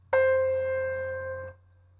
piano normal c5